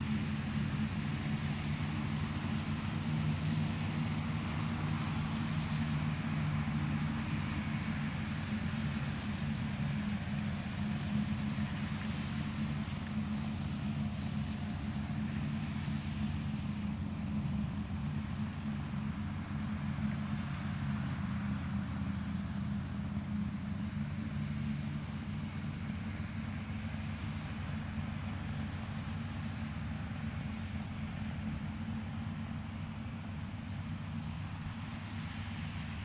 Distant Tractor FF656
A distant tractor. Lots of low tones, air, constant rumble.
Tractor, Farm-Equipment, far-off-tractor